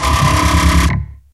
140.658 BPM
made using reason 6.5